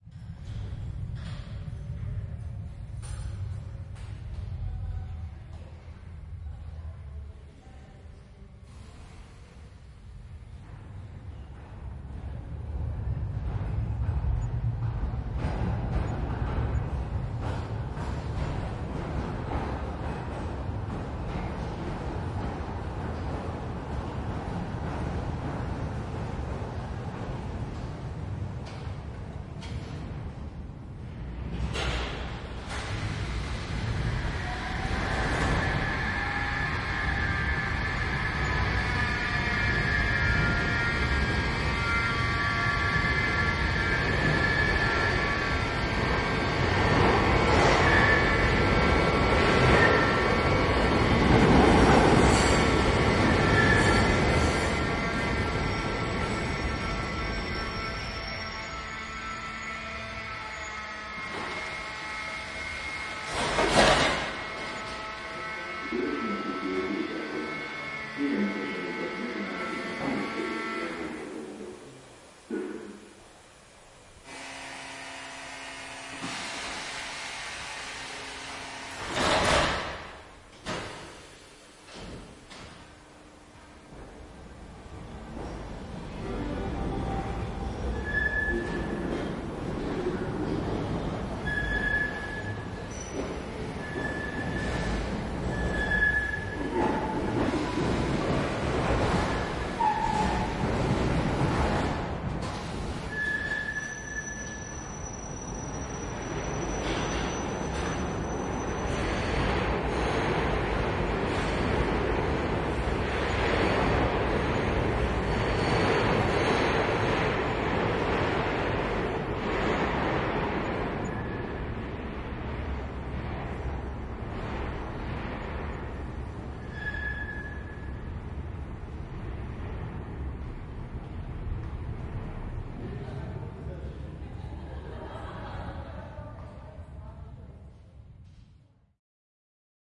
Recording of a metro train arriving and departing at a platform at Kálvin tér, Budapest, Hungary.
Recorded with ZoomH2n